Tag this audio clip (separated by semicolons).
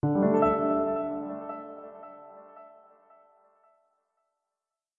calm; delay; mellow; mood; phrase; piano; reverb